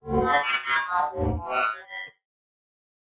Experimentl Digial Sound 05
Intuitive Experimental Digital Sound Juice.
Josh Goulding, Experimental sound effects from melbourne australia.